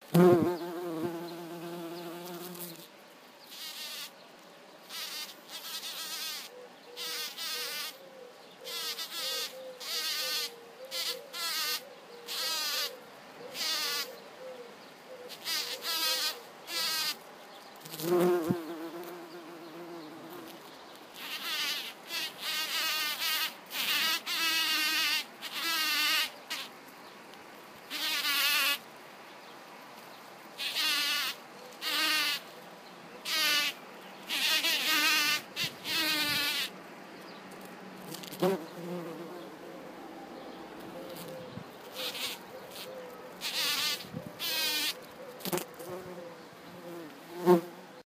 Bumblebee flowers noises
The sound of a bumblebee foraging
rose, insect, Bumblebee, wings, noises, gargen, botanical, foraging, plants, fun, flowers, forage, wing